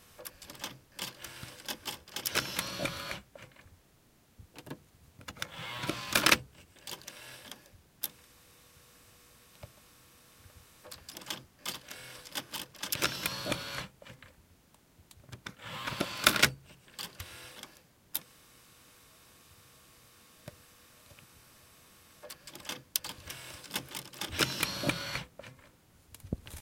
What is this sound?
The cassette deck functioning inside a VW polo.
Recorded with a Shure MV88